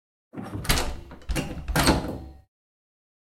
cant open
foley recording of me trying to open a locked door. it makes a nice squeaky sound. recorder with zoom h4n.